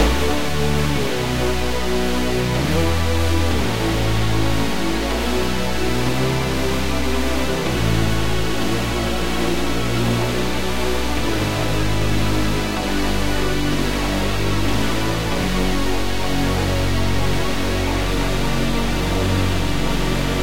188 bpm Synth Layered Pad (Loop)
A chord progression loop I pulled from one of my remixes. Made with stacked synth pad and bass layers, and compiled in REAPER.
chords, synths, string, progression, modulation, progressive, 188, trance, distorted, chord, synthesizer, FX, flange, phase, strings, rock, sequence, synth, techno, pad, 188bpm, bass, tremolo, melody